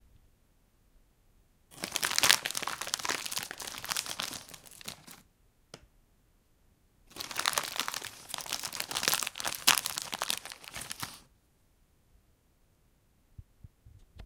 Plastic bag crinkle and crumple
A hand being put into a thick plastic bag.
bag, crinkle, crumple, crumpling, plastic, plastic-bag